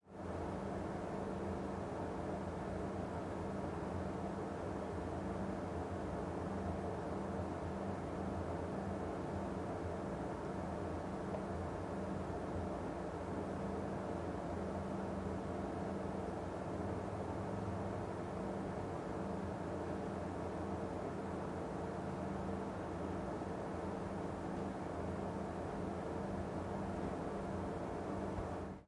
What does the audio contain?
Kitchen Ambience During Daytime
Recording of city ambience from inside my kitchen during daytime.
Processing: Gain-staging and soft high and low frequency filtering. No EQ boost or cuts anywhere else.